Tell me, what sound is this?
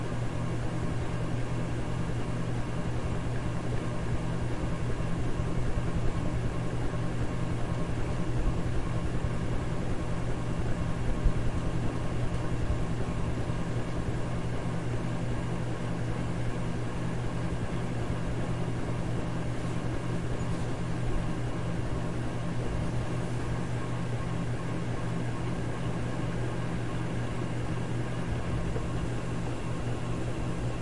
Ceiling Fan
ceiling, fan, indoor